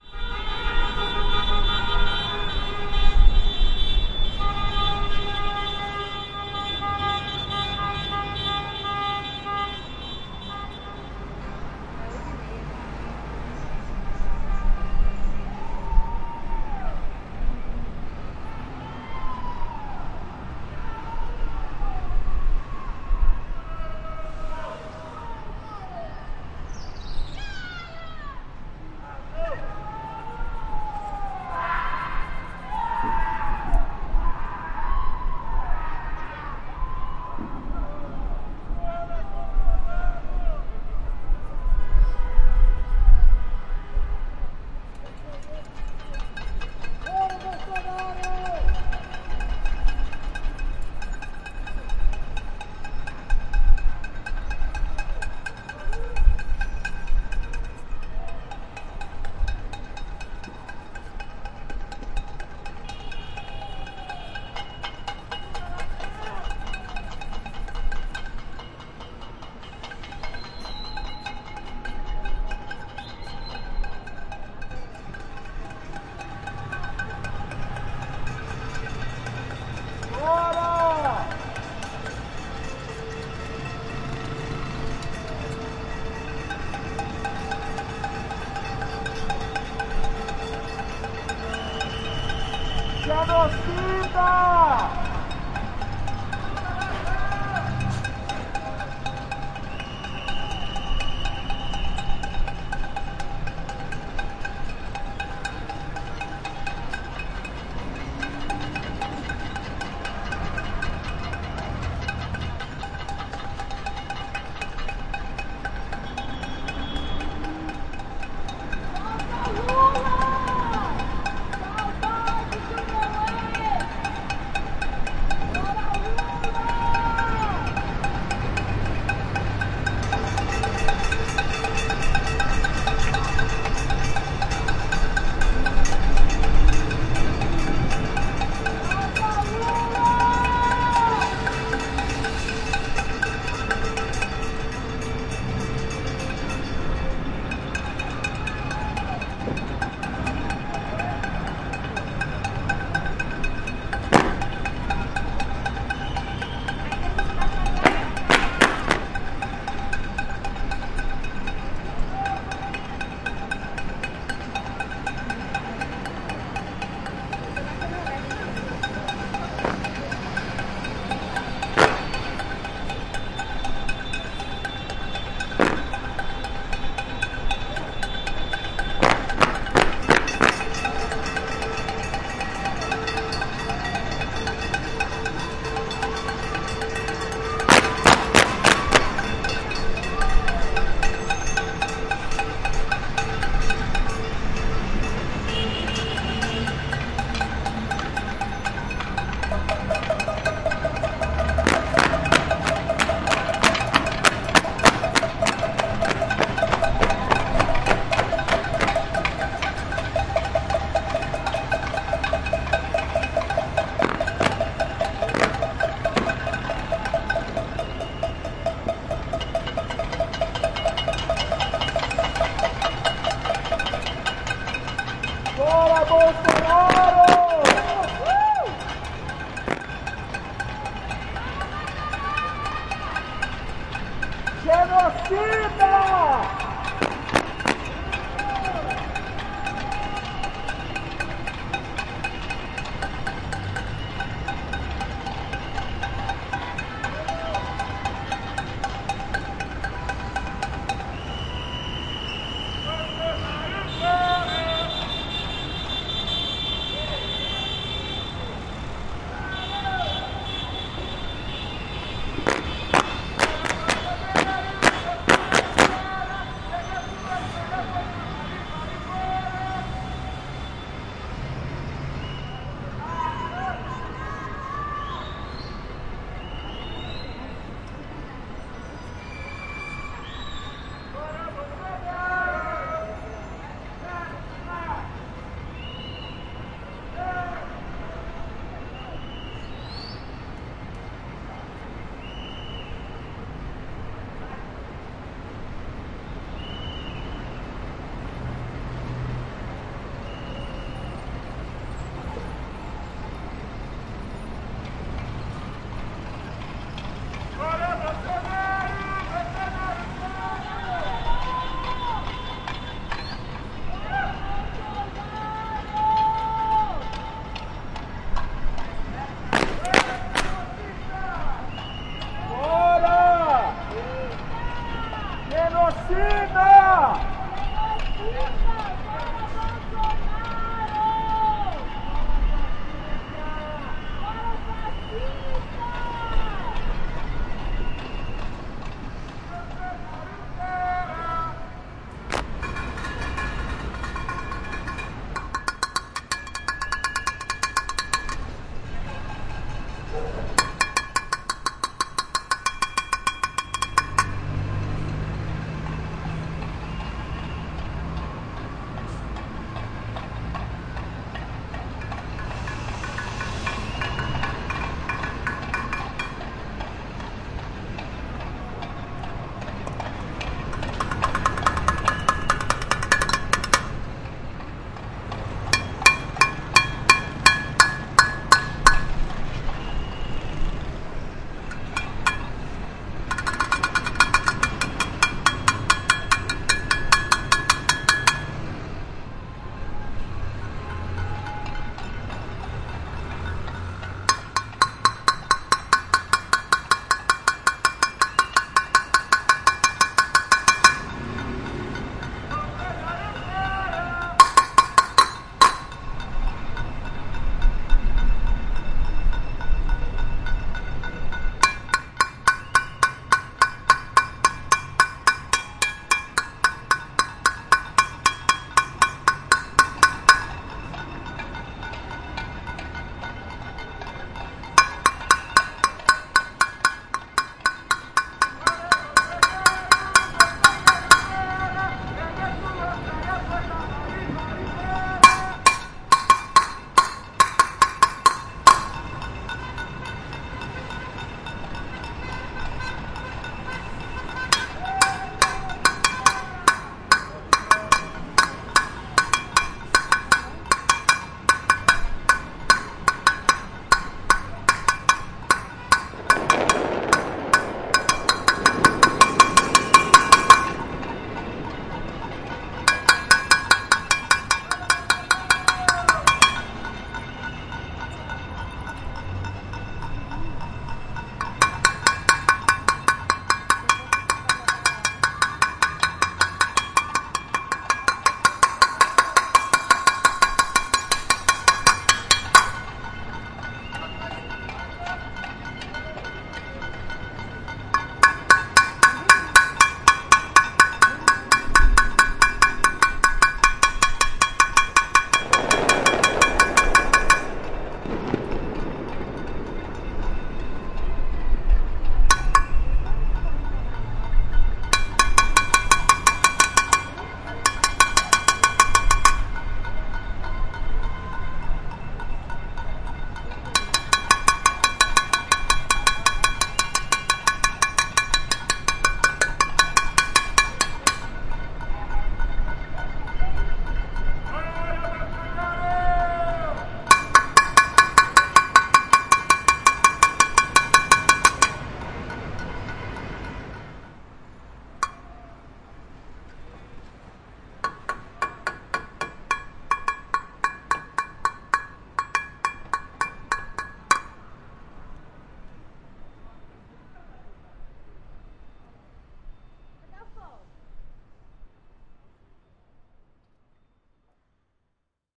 Panelaço Fora Bolsonaro no centro de Belo Horizonte 25/03/20
Against Bolsonaro, people bang pans and scream at the windows of their apartments at night in downtown Belo Horizonte.
9th Day of protests in face of the crisis triggered by the Brazilian president after his actions while COVID-19 spreads across the country.
Recorded on a Zoom H5 Recorder.